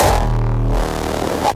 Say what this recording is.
Hardstyle Kick 7
Raw, Hard, Bassdrum, Rawstyle, Hardstyle, Kick, Layered, Distortion, Hardcore, Drum